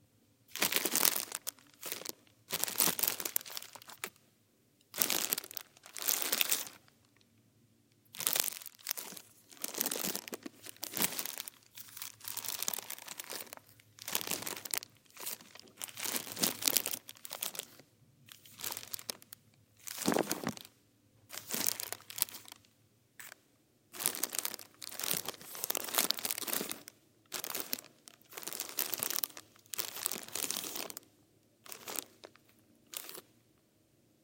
Plastic bag, rustle handling crinkle
handling a plastic bag
bag, crinkle, handling, plastic, rustle